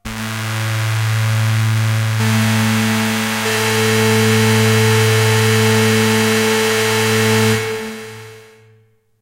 Me direct rendering dramatic stabs and swells with the Neumixturtrautonium plugin for use in as scene transitions, video game elements or sample loops.
trautonium, loop, stab, vst, swell, soundscape